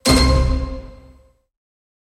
Short win result simple sound
animation, cinematic, end, fanfare, film, game, lose, movie, win, wrong